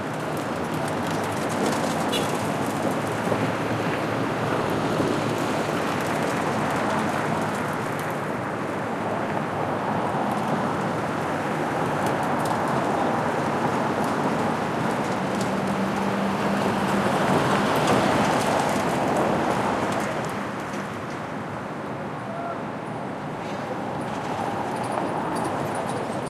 Field recording of Times Square in New York City recorded at 6 AM on a Saturday morning. The recorder is situated on the corner of 7th Ave and West 45th St, some cars (mostly taxis) are underway, some (very few) people as well, cleaners and a team of subway construction workers are on the scene.
Recording was conducted in March 2012 with a Zoom H2, mics set to 90° dispersion.
ambience, ambient, atmosphere, cars, city, field-recording, mid-range, morning, New-York, noise, NY, people, soundscape, street, Times-Square, traffic